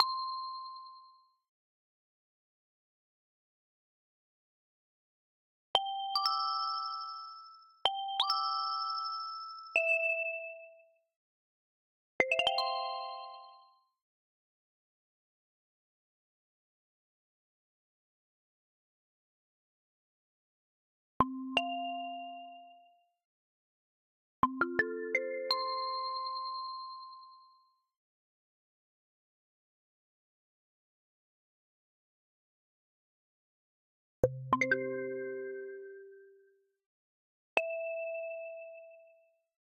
FX Made up mobile phone alerts Samsung iphone SYNTHY
Samsung or iphone style alert tones, made by converting existing mobile phone tones to midi and then playing with a synth (omnisphere) and changing the odd note.
alert, bing, bong, buzz, iphone, mobile, phone, samsung, telephone